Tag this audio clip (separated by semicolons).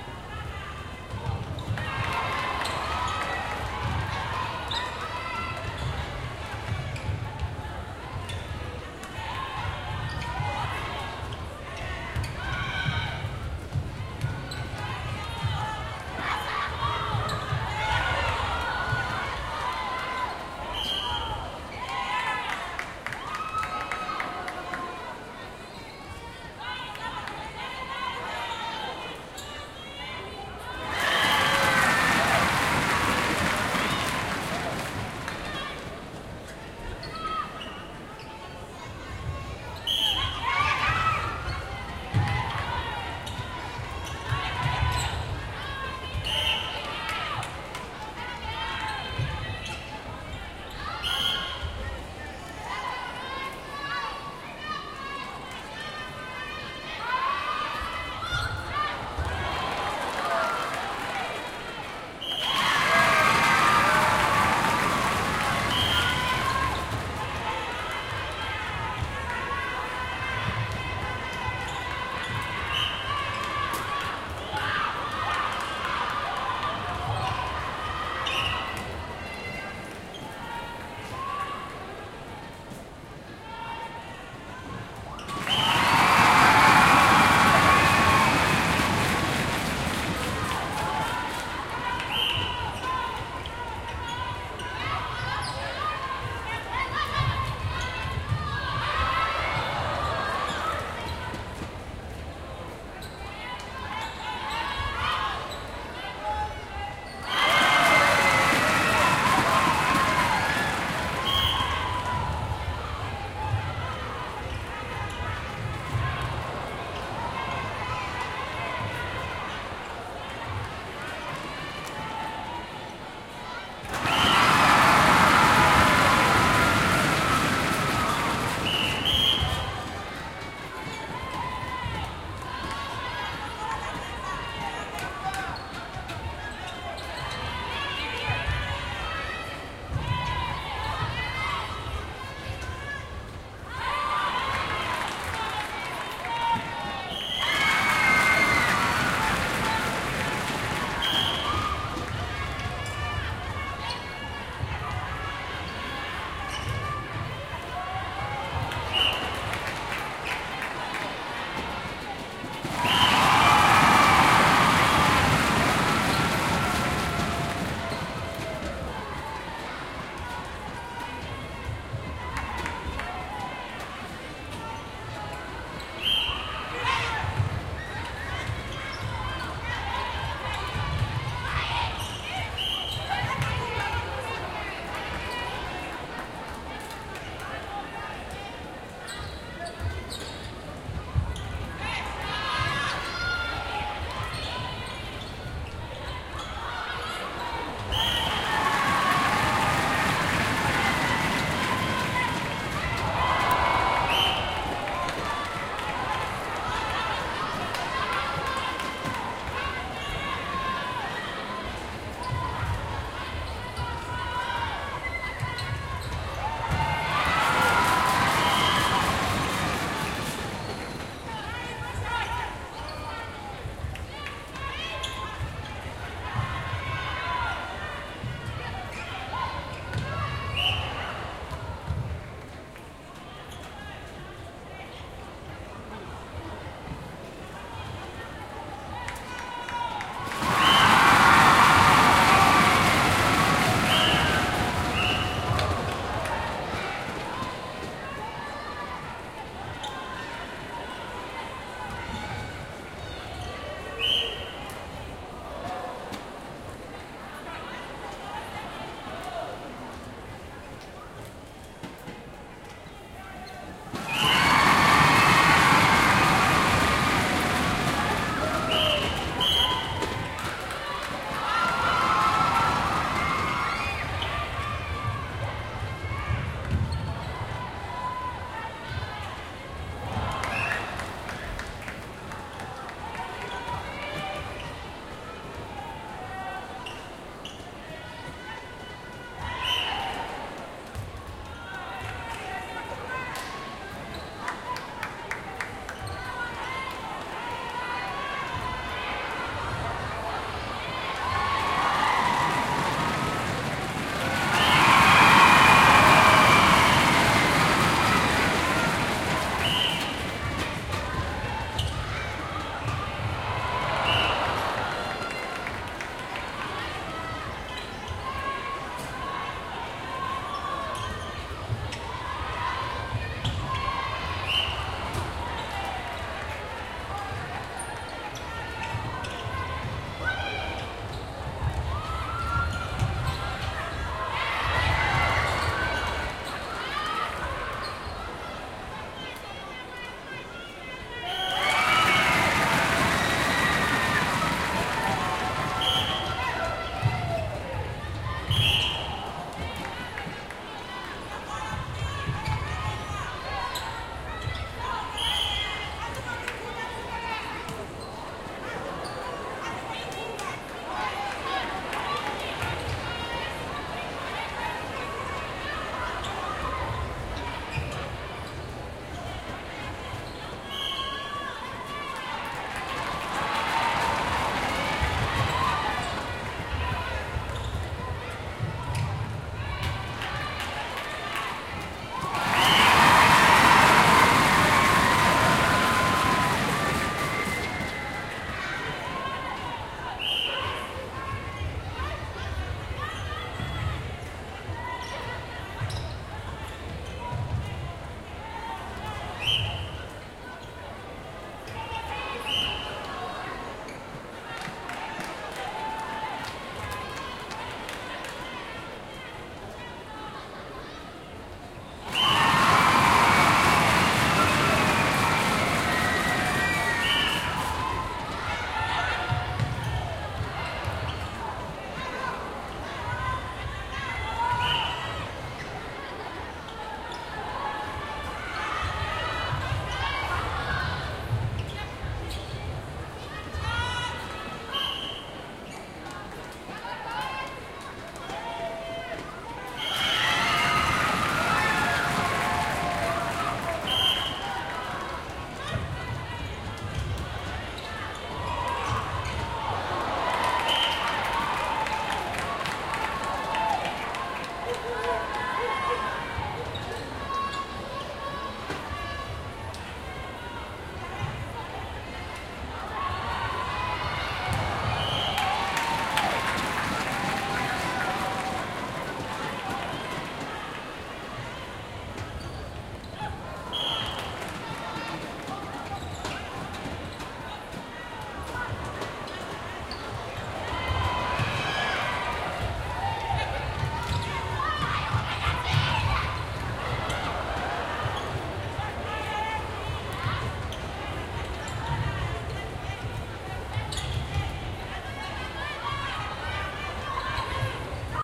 cheering; clapping; crowd; netball; stadium